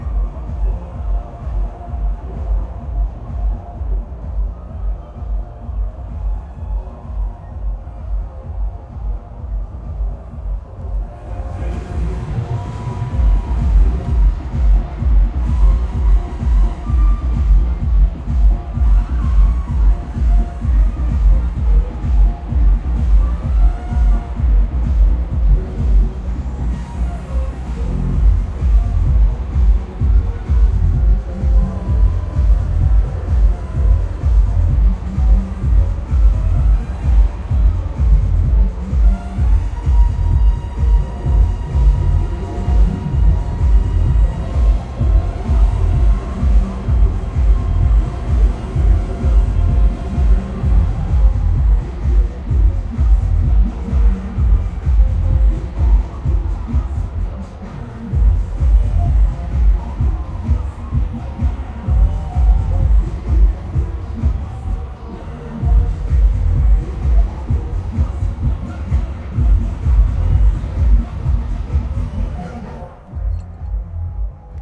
Yourell Gaslamp Crowd 1
The crowd hasn't arrived, so the night clubs are very audible, lots of bass beats pounding. It's a summer night in the San Diego Gaslamp District. Recorded in stereo from high up in a hotel window. Some street sounds waft up as well. The other two in this series are different. Both have thick crowd noise. The no. 2 track has an angry crown cordoned off by the police.
Ambient, clubs, District